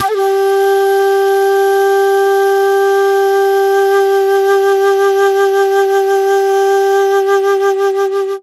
Flute Dizi C 067 G5
Flute Dizi C all notes + pitched semitones
C, Dizi